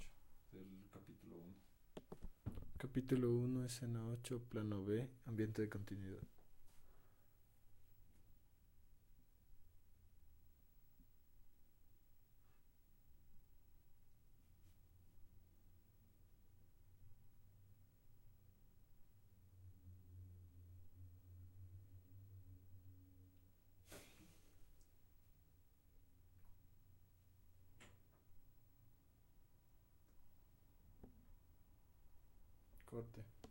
Ambiente Ciudad Día 2
Ambiente en interior de la zona centro norte de la ciudad de Quito-Ecuador al medio día. This sound
it´s mine. Was recorded with my Nh4 in the film "La Huesuda" in Quito-Ecuador. It´s Totally and definitly free.
2-Interior-Medio, Ambiente, a-Zona, Centro, d, Ecuador, Norte-Quito